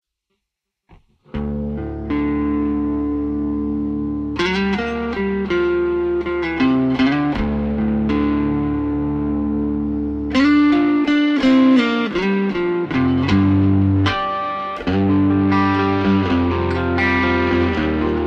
Short processed guitar riff with western sound to it